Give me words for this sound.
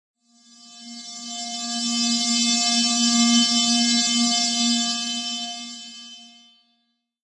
UHF Pickup
Pad sound with a higher pitched buzz, as if a piece of electronic equipment was getting RF interference.
ambient, dark, dirge, edison, fl, flstudio, pad, soundscape